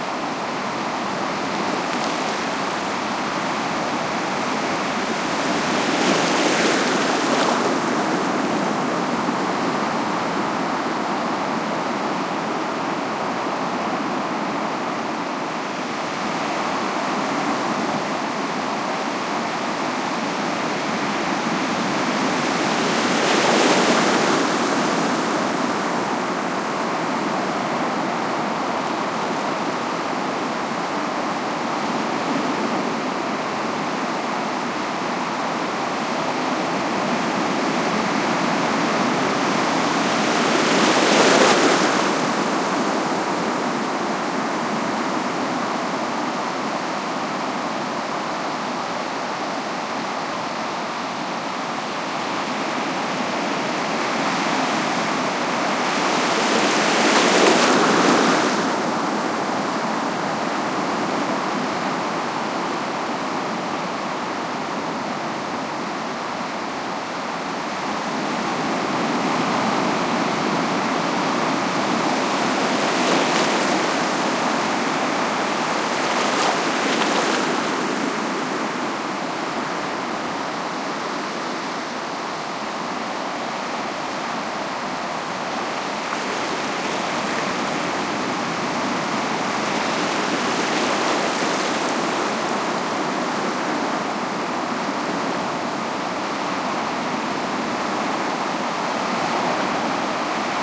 Waves splashing, unequalized. Recorded near Vilareal de Santo Antonio, Algarve, Portugal. Primo EM172 capsules inside widscreens, FEL Microphone Amplifier BMA2, PCM-M10 recorder